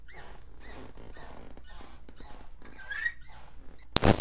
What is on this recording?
Meat Grinder+Floor
This is a toy meat grinder squeaking slowly and my floor creaking in a fast rhythm.
416, creaking, dat, metal, mono, recorded, squeaking, using